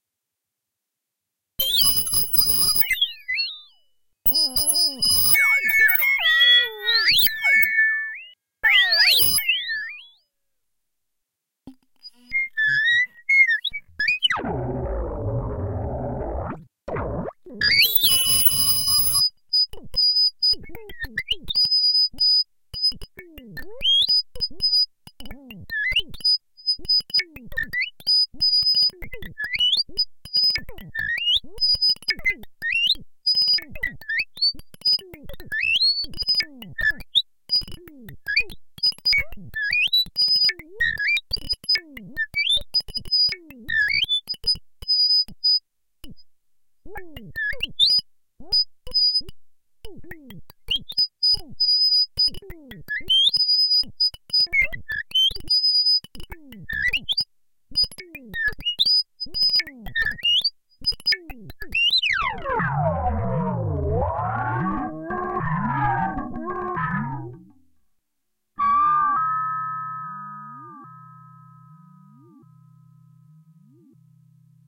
Glitched Oscillations 6
Various high pitched oscillation sounds and glitches for sampling.
Made with Clavia Nord Modular.
[original filename] 2010 - Bodies.
electronic, electricity, digital, robot